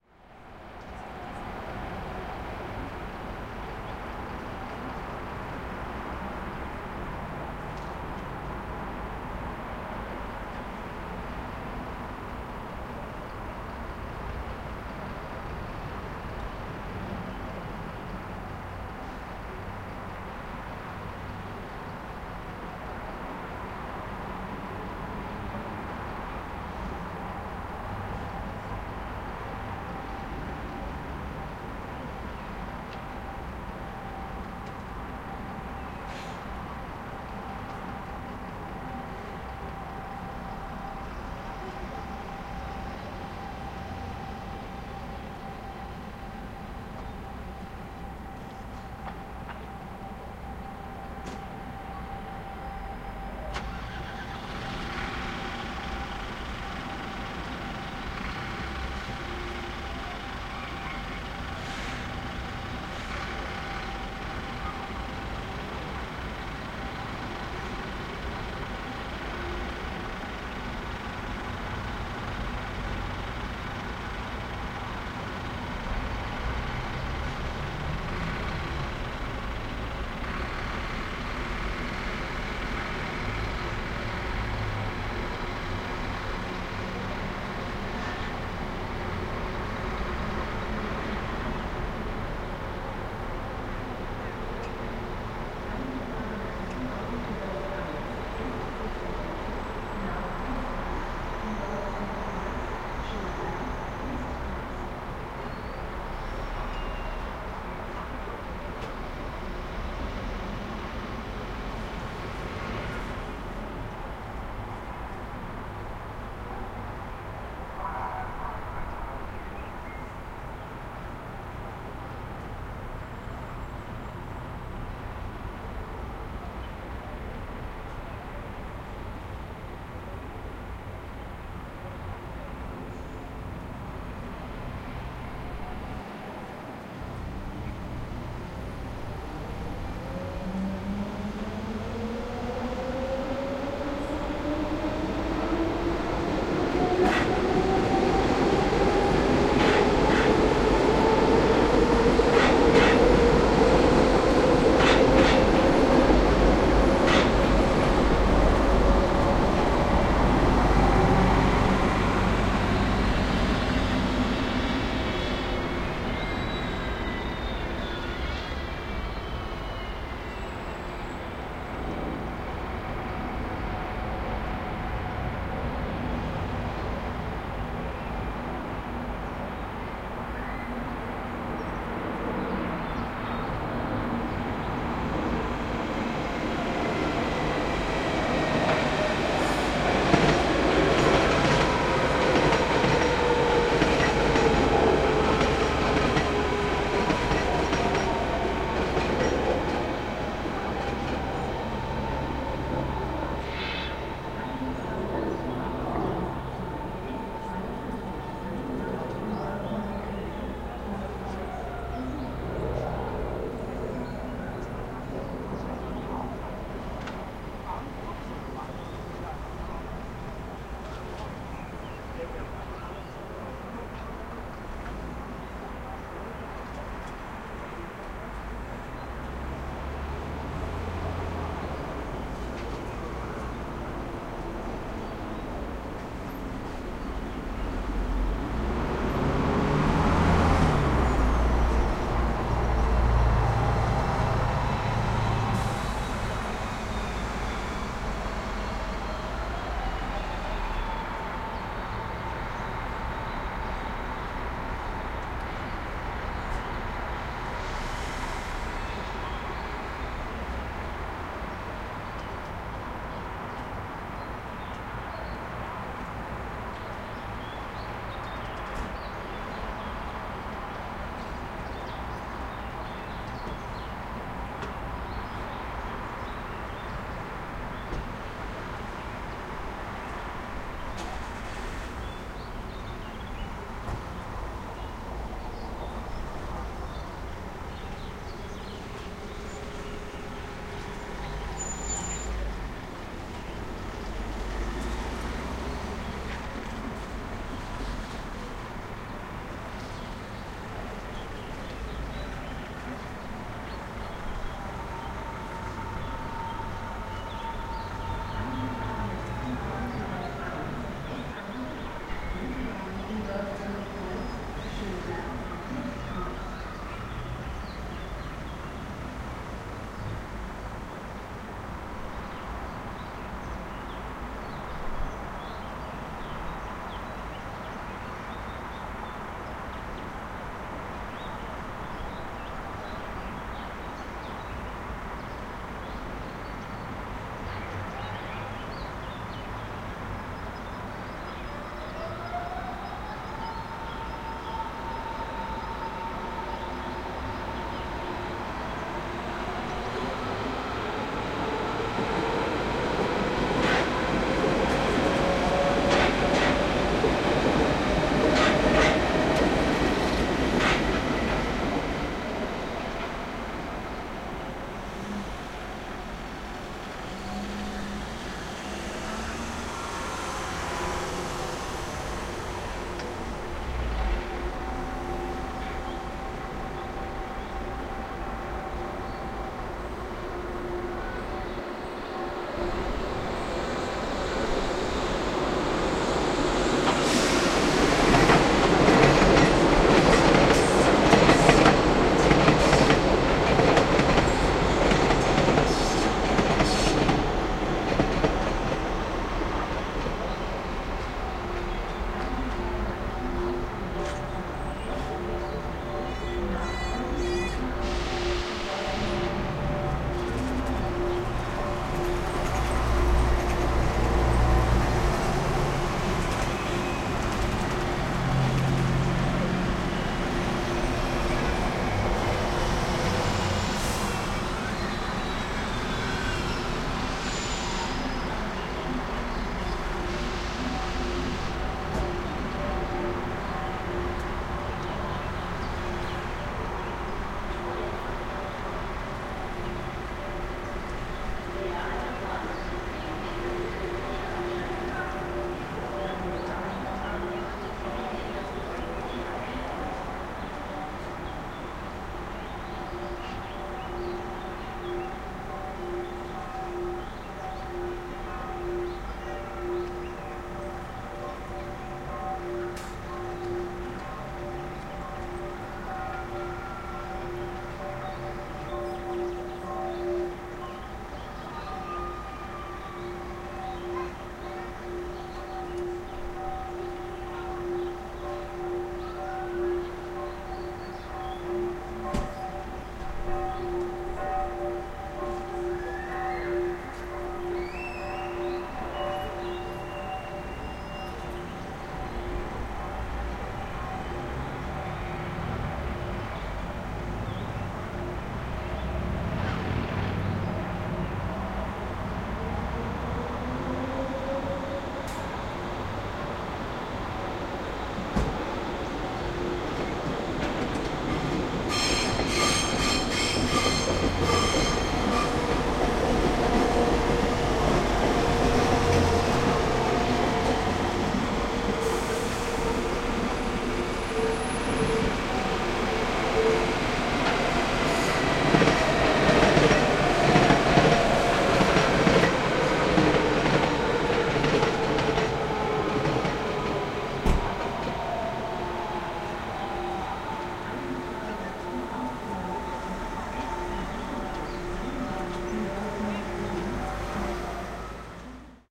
Ambience recorded in Berlin-Neukölln in summer. Evening sound with trains and coaches, some birds